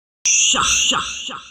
This is a vocal effect with me saying "Sha" Echo, phaser, delay, and reverb effects are added